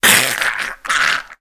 A voice sound effect useful for smaller, mostly evil, creatures in all kind of games.